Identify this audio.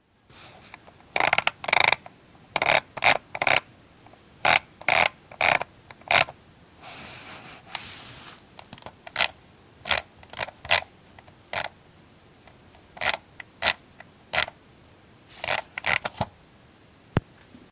Mouse wheel scrolling